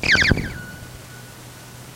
Sound from beginning of the answering machine outgoing message I uploaded.